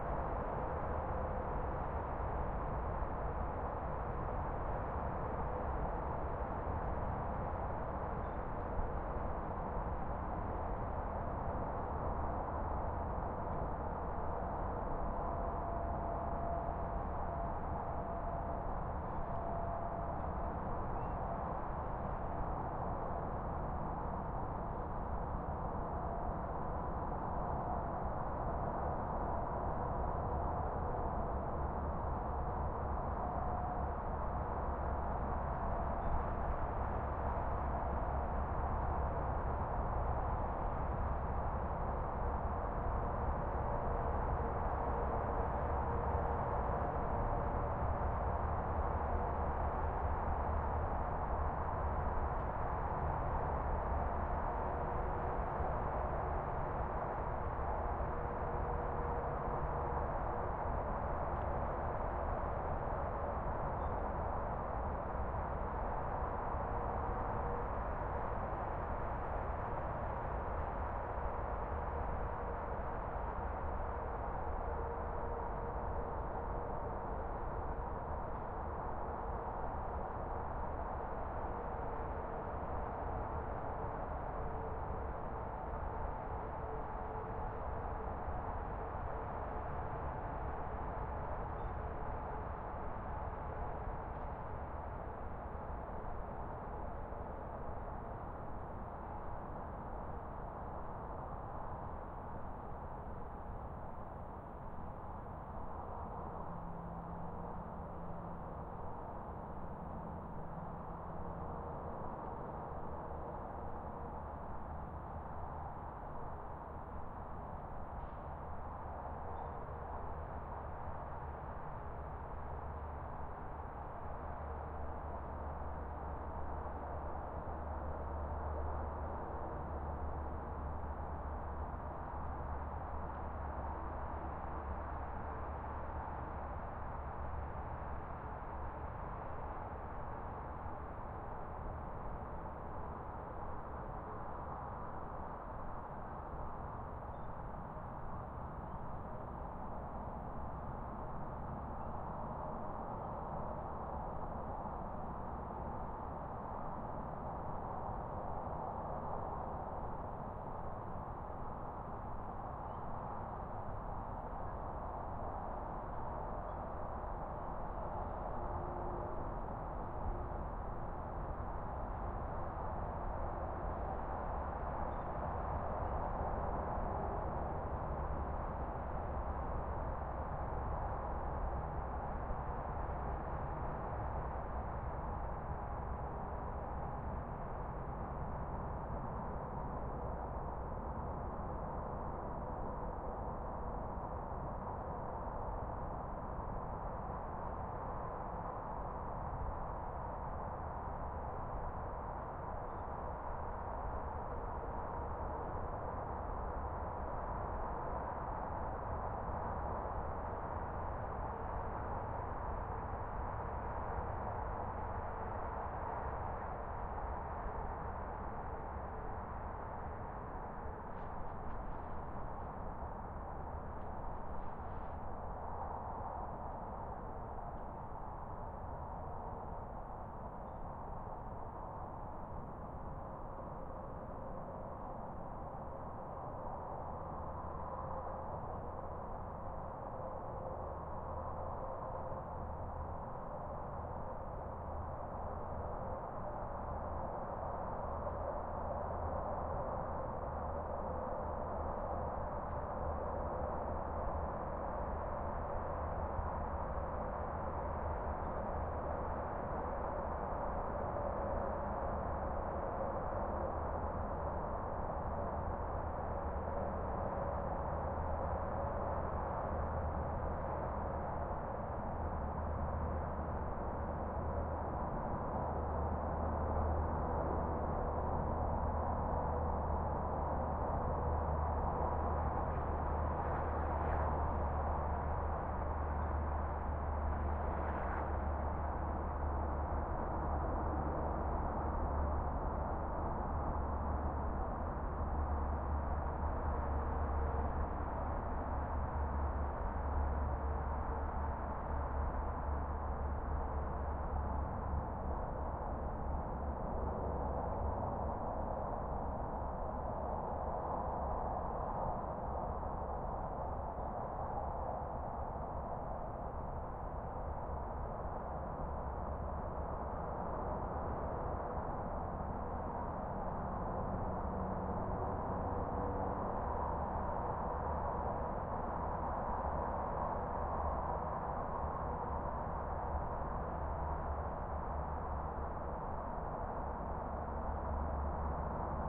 skyline distant highway far haze from campground night1
night, haze, highway, distant, campground, skyline, far, from